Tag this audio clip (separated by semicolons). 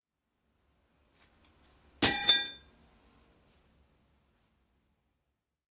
fall; foley; impact; knife; metal; sword